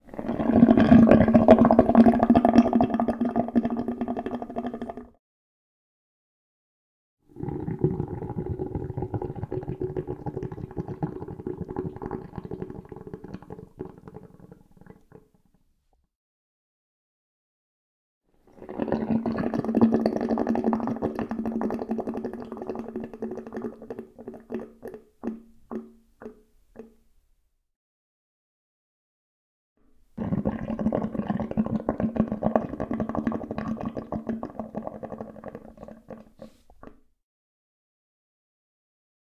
17 - Tap, guggle
The wash basin is bubbling. (more versions)
faucet, drain, tap, cz, bathroom, sink, water, bath